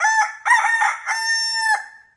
Farm Rooster Crows once V1
This sound effect is played when the metal steel pinball hits the all three phobia drop targets (reskinned from Space Drop Targets) and you hear this "Cock-a-doodle-dooooooooooo!" sound effect. This sound effect can be heard anytime when you hit all three for Field Multiplier drop targets. DON'T FORGET to add Left and Right Flippers, plus add Left and Right Rebounds!
time-up, everything, bell, noise, wake-up, cock, machine, soundeffect, chickens, crowing-roosters, farm, chicken, wake, bonus, sfx, electronic, pinball, rooster, bonus-alarm, digital, strange